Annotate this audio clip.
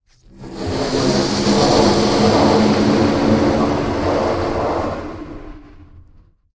Balloon - Inflate 03
Fun with balloons :)
Recorded with a Beyerdynamic MC740 and a Zoom H6.
Balloon, Pressure